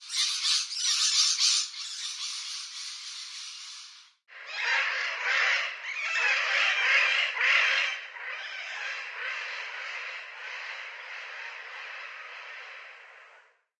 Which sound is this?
Binaural recording. I am standing in my front garden, there a lots of parrots in the trees chatting. This is the sound of the parrots slowed 2X.